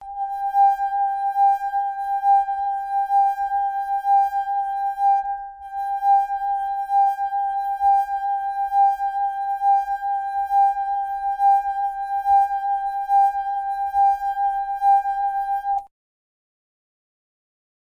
17 Tehoste lasinsoitto6
Playing a water glass, a very even, sinewave-like sound
glass, resonance, ringing, wineglass